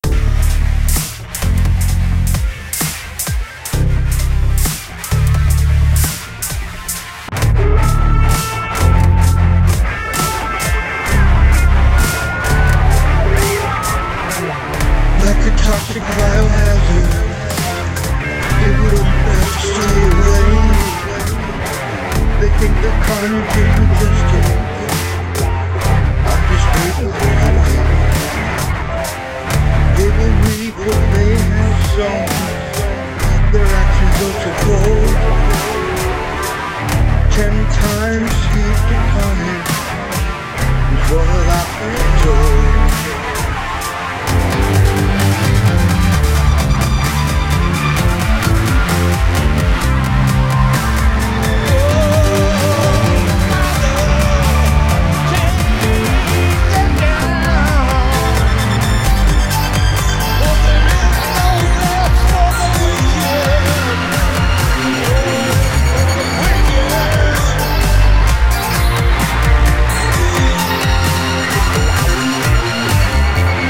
A short clip from one of my Original Compositions.
Equipment used: Audacity, Yamaha Synthesizer, Zoom R8 Portable Studio, Hydrogen and my gronked up brain.
Rap, Original, Dubstep, Traxis, Country, Clips, Music, Beats, House, Blues, Keyboards, Jam, Audio, Electro, Classical, Rock, Synth, Dub, EDM, Guitar, Techno